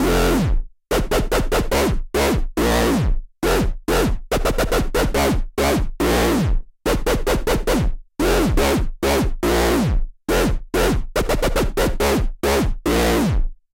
Scream Wobble
small wobble-loop. rate or tell ur opinion! i appreciate it!
120bpm, bass, dirty, dubstep, gritty, loop, scream, skrillex, synth, synthesizer, wobble